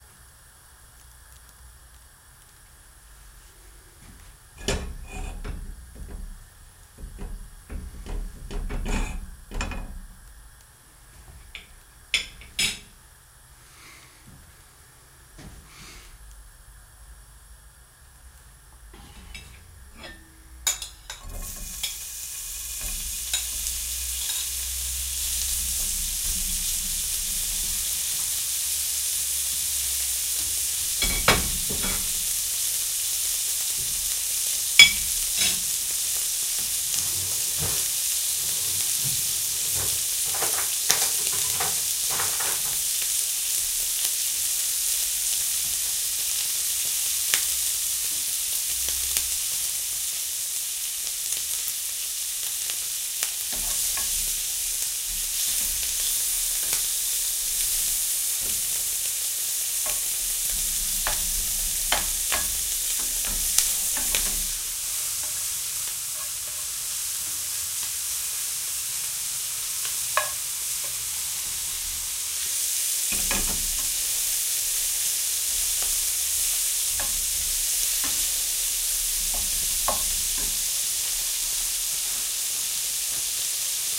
This is the sound of baking spam spam spam spam bacon and spam but without the spam. You hear the burning gas, a pan (already hot with butter in it) is put on the stove, bacon is added and stirred with a wooden spoon. The pan is removed from the stove for a few seconds and put back.
Marantz PMD670 with AT825. No editing done.